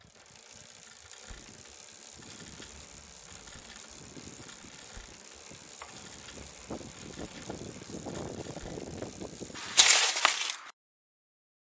Low Speed Skid Crash OS
Mountain-Bike Crash Skid
Skid, Mountain-Bike